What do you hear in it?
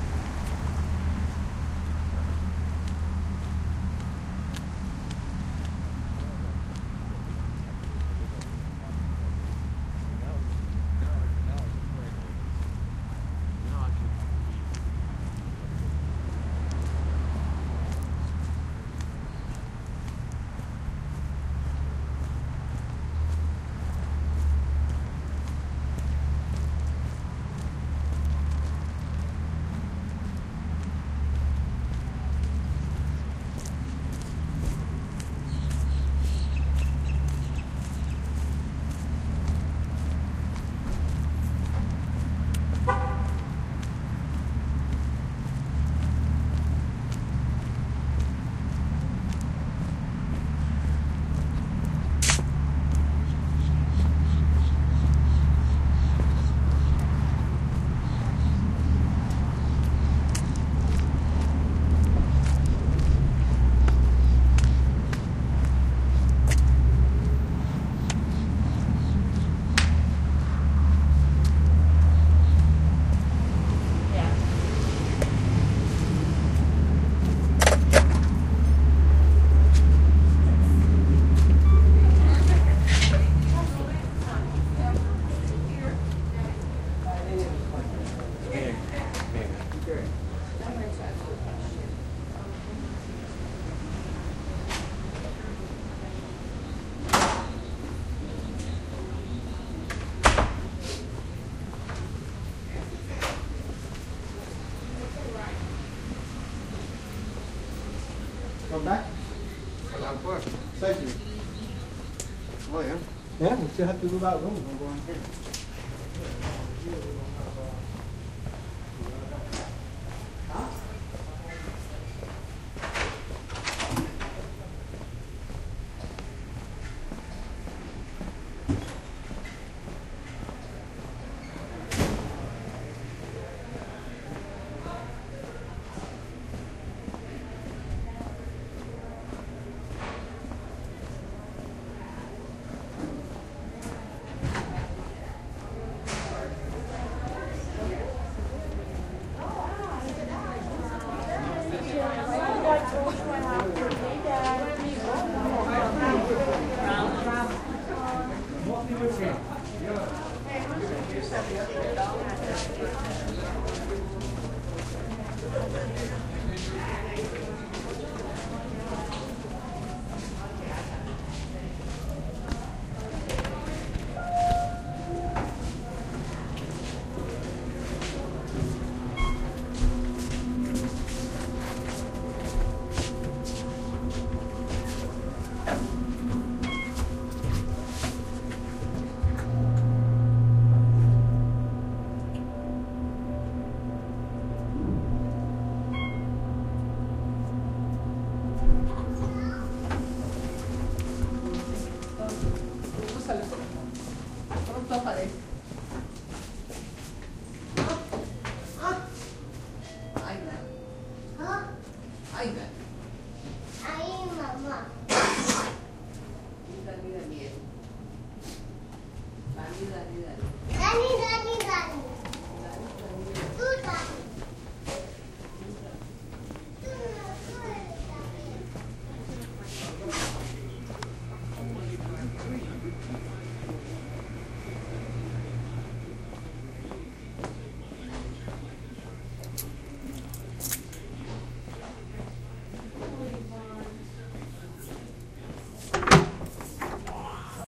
baby birth walkingin2hospital
Sounds from the hospital during the birth of a baby, I will return to update descriptions
field-recording, hospital